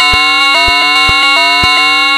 110 bpm FM Rhythm -57
A rhythmic loop created with an ensemble from the Reaktor
User Library. This loop has a nice electro feel and the typical higher
frequency bell like content of frequency modulation. Experimental loop.
High and mid frequencies. The tempo is 110 bpm and it lasts 1 measure 4/4. Mastered within Cubase SX and Wavelab using several plugins.